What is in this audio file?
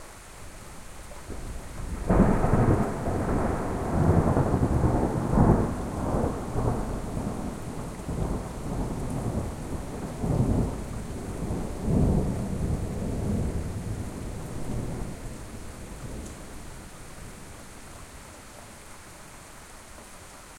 This is a pack of the very best recordings of thunder I made through April and May of this year. Many very loud and impressive thunder cracks, sometimes peaking the capabilities of my Tascam DR-03. Lots of good bass rumbles as well, and, as I always mention with such recordings, the actual file is much better quality than the preview, and be sure you have good speakers or headphones when you listen to them.
bass, boom, cats-and-dogs, crack, deep, lightning, loud, pitter-patter, pour, rain, rumble, splash, thunder, water